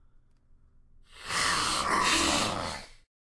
Large Angry Cats
My friend and I made cat noises and layered them on top of one another. This was for a sound design project.
Cat, Cats, Hiss, Hissing, Monster, Monsters, Vocal